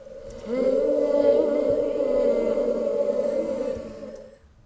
Spooky Singing Choir
I recorded myself singing a few random notes and combined them, then editing them. They came out to sound like an interesting spooky choir of voices.
multiple-voices, vocal, spooky, voices, sing, vocals, voice, singing-choir, singing, choir